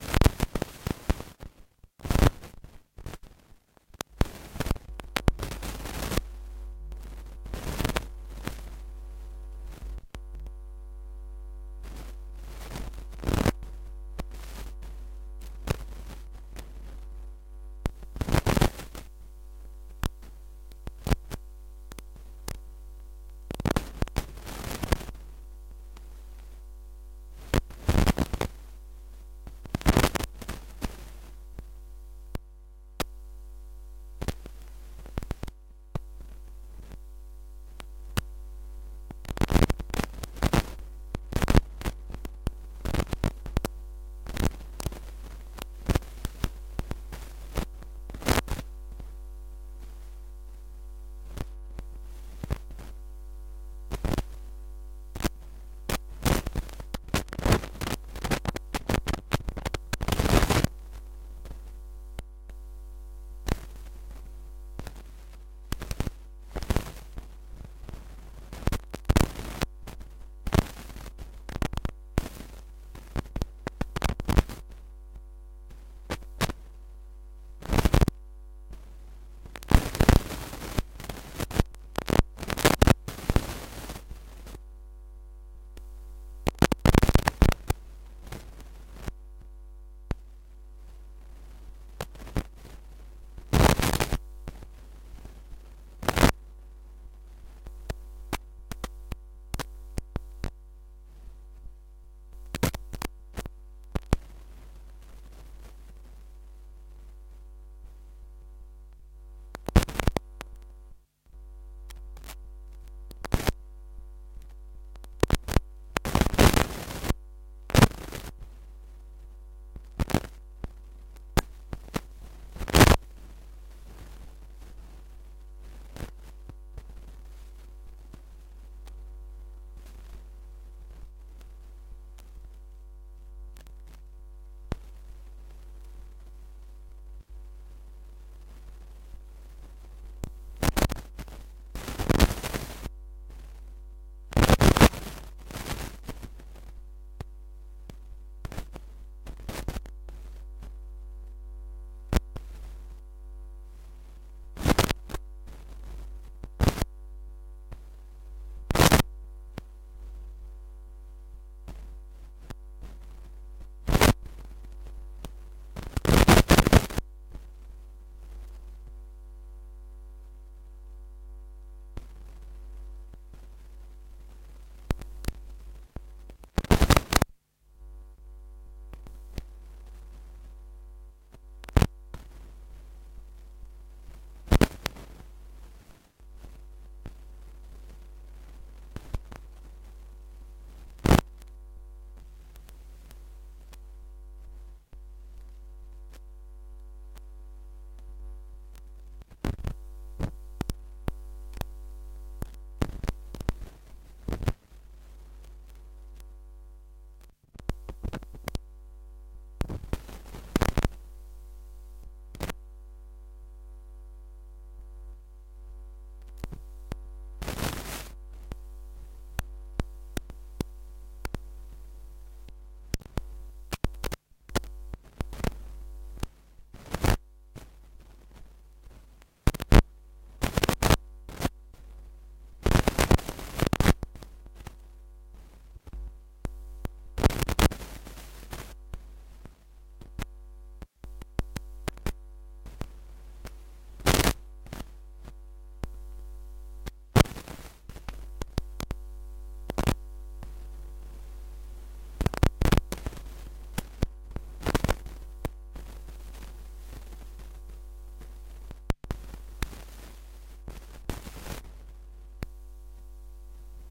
A sequence that reproduces the sonic signature of noisy audio equipements like turntables or any other noisy/creaky piece of gear. Created on a Roland System100 vintage modular synth.
hum, noise, vinyl-scratch, audio-equipment